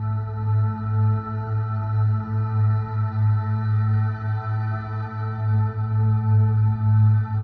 110Hz minus5
Then I edited the sample to get rid of 5th, 10th, 15th, 20th and some more harmonics.
Using this timbre, one should be able to make septimal thirds (9/7 major and 7/6 minor) sound more consonant by subtracting dissonance peaks around the usual (pental) thirds (6/5 minor, 5/4 major), which should be constituted by harmonics 5n. Other intervals close to intervals with 5 in their ratios should sound a bit more consonant too (e. g. usual sixths: 8/5 minor and 5/3 major).
I haven’t experimented yet but I assure you. :D
(There is another sound in this pack which almost lacks some lower 3rd harmonic multiples. This should allow you being close to harmonies without fifths and fourths (3/2 and 4/3 resp.), e. g. maybe making the septimal tritone 7/5 to sound better.)
Also one should probably take a sampled instrument and process all its samples with a notch filter, or a comb filter, or FFT or something else, to make a timbre with good usability.
pad, drone, overtone-notch, Paulstretch, airy-pad, subtle, xenharmonic, smooth, dreamy